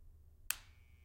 Dial light turned on
A living room dial style dimmer light turned on.
light, turned, dial